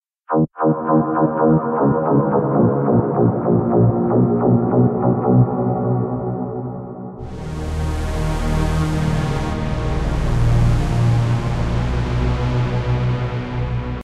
CLUB STRIP OF SAMPLES I MADE BY KRIS USING DRIVEN SNARE ON FREE SOUND ON SIMPLER A.L
club, dance, hard-techno, rave, techno, trance